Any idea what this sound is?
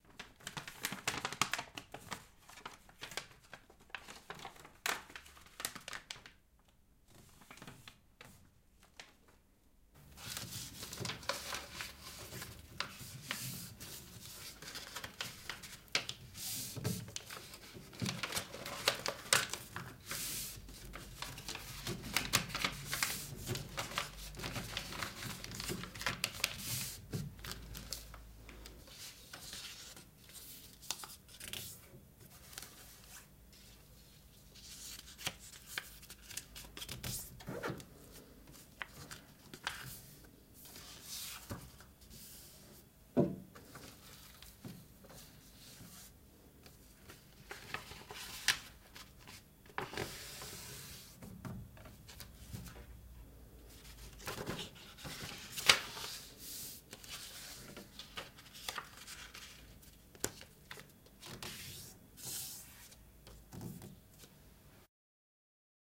Folding paper in different ways and speeds